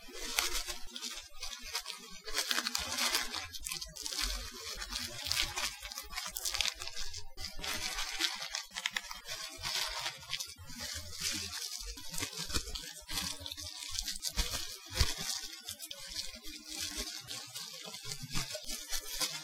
lots of ruffling bubble wrap with no pops
bubble, plastic